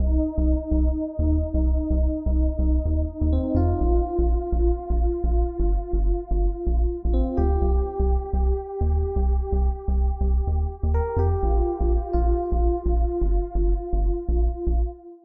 Bass, Pad & Piano
This is a sample of my track "DΔGΔZ - I see you"
Pad, Electric-Dance-Music, Loop, Bass, Synthesizer, Piano